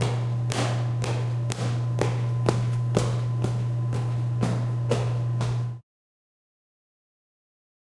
high heels rmk
Footsteps in high heels.